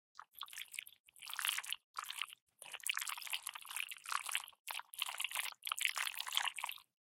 Slime Movement
Recording of some spaghetti processed with SoundHack to sound thicker.